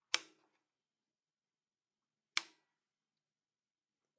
A light switch on a video lamp switched on and off